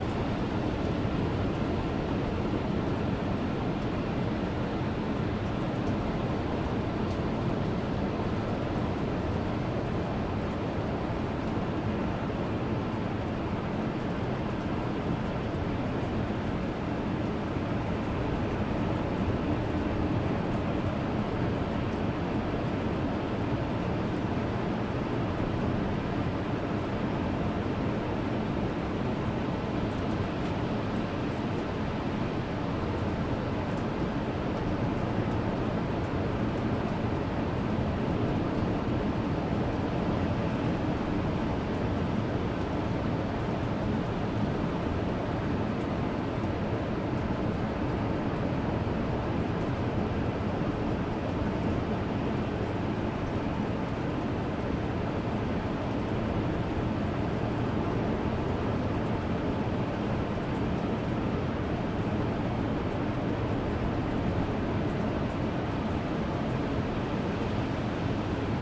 Ruby Beach on the Pacific Ocean, Olympic National Park, 20 August 2005, 7:50pm, standing with in the back of small cave facing ocean
ambiance, beach, cave, ocean, pacific, shore, surf